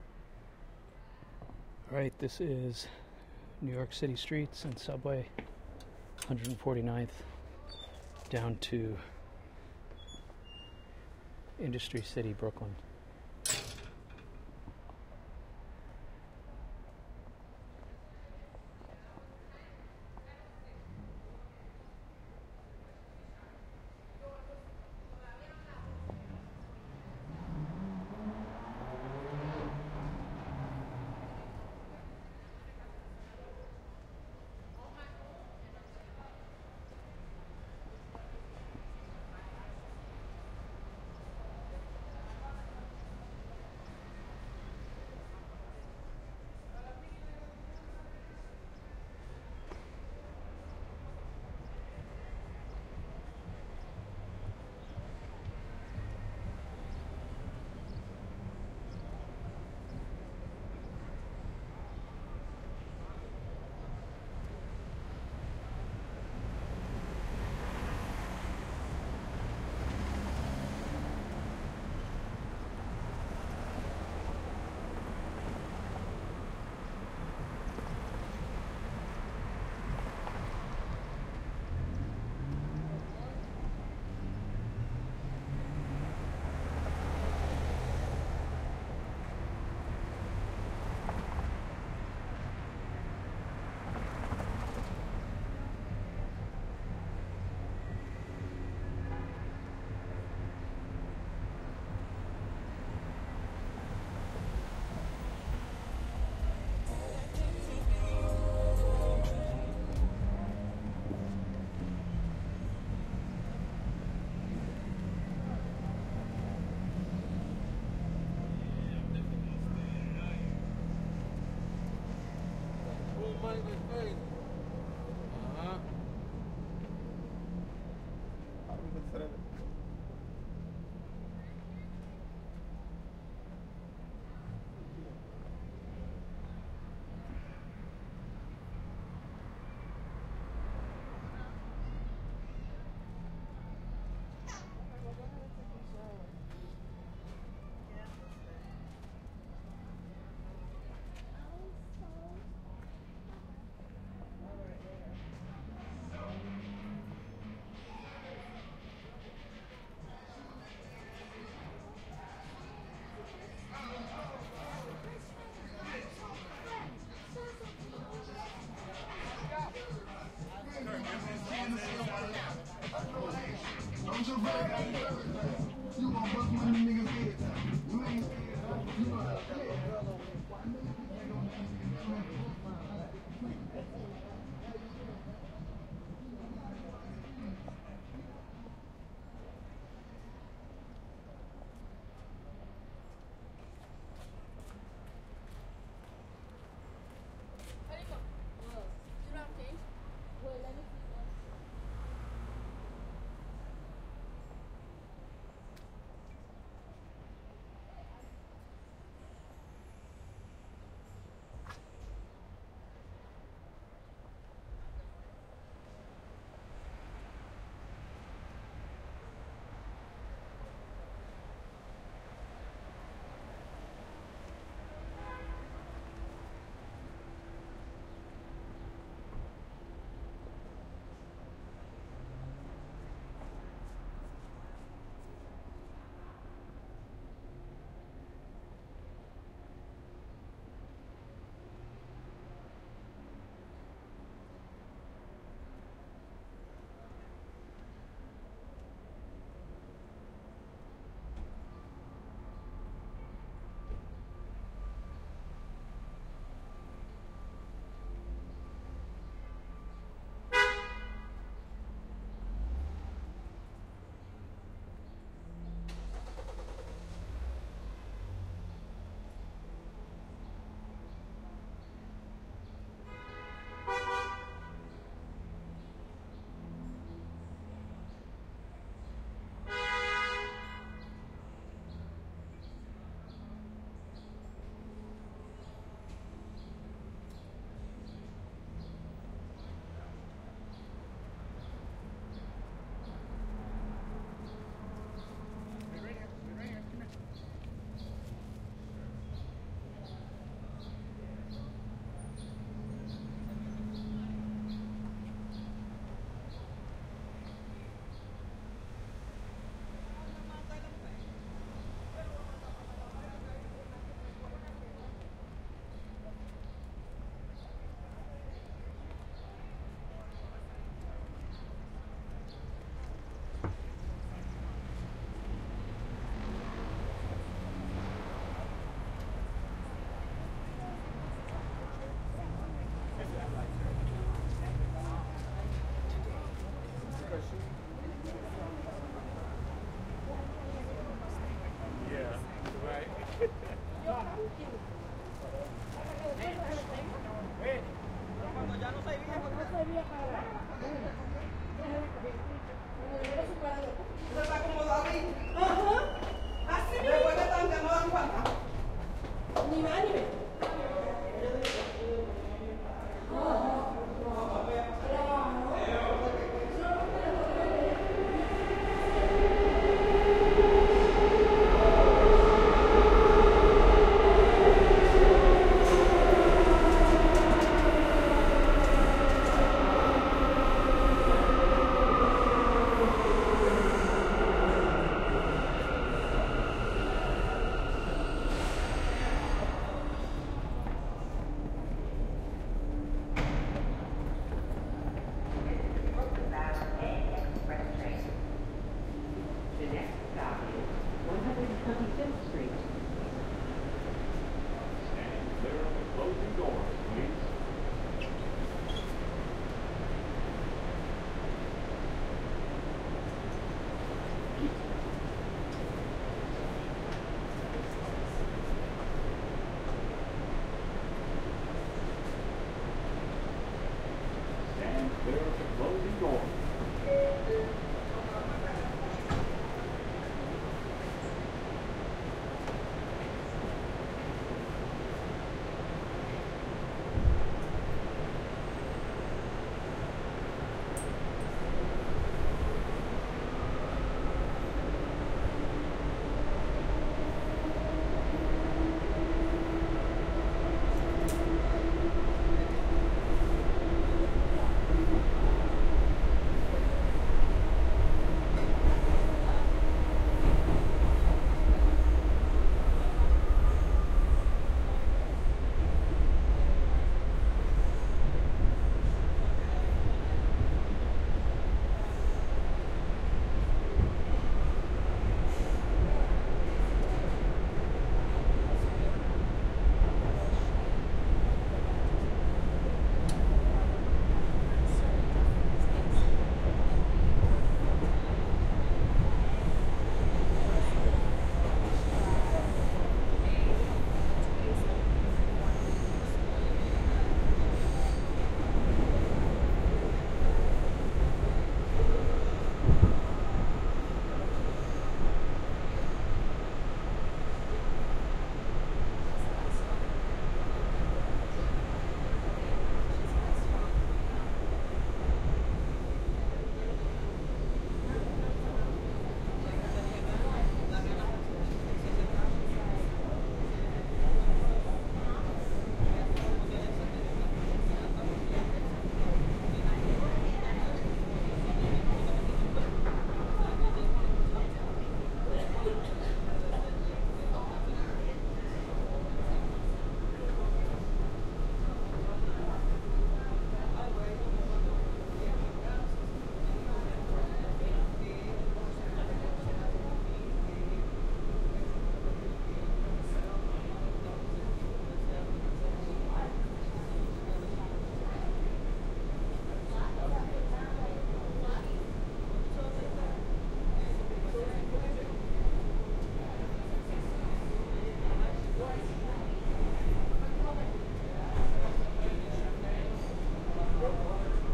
NYC Commute Harlem to 7th Ave Station Part 1
NYC Commute — Harlem to 7th Ave Station (Part 1 of 6)
Credit Title: Sound Effects Recordist
Microphone: DPA 5100
Recorder: Zaxcom DEVA V
Channel Configuration (Film): L, C, R, Ls, Rs, LFE
Notable Event Timecodes
PART 1: 01:00:00:00
01:00:00:00 — Header & Description
01:00:35:00 — Clear / 149th between Broadway & Amsterdam
01:01:10:00 — 149th and Amsterdam
01:02:56:00 — 149th and Convent Ave (Block Party)
01:03:35:00 — Convent Ave between 149th and 148th
01:04:15:00 — Convent Ave and 148th
01:05:25:00 — 148th and St Nicholas Pl (***features uncleared music in vehicle passby***)
01:05:52:00 — Entering 145th St Station Downtown
01:06:18:00 — Turnstile Entrance
01:06:29:00 — Running Down Stairs to downtown A Train
01:06:45:00 — Boarding Train
PART 2: 01:09:38:10
PART 3: 01:19:13:02
01:21:26:00 — Train Doors Open & Exit Train at 59th St / Columbus Circle
Ambient, city, New-York-City, NYC, street, subway, Surround-sound, traffic, train